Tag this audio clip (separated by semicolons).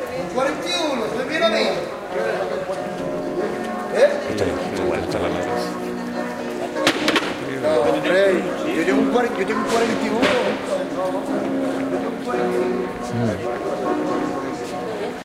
spanish; south-spain; street